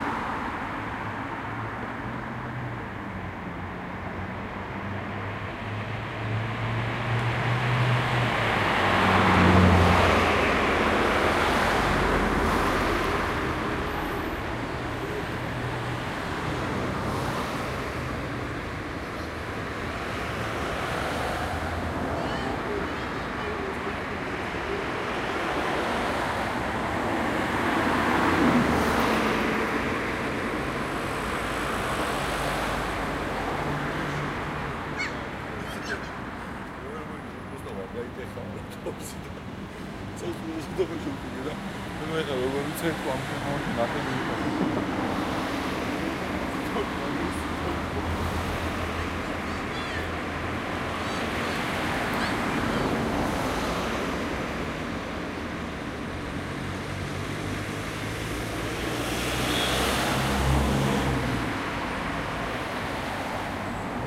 Tbilisi traffic ambience and children playing
Used H2N to record children playing near the road.
26/04/2015 - Dighomi Massif, Tbilisi, Georgia.
street, tbilisi, traffic, kid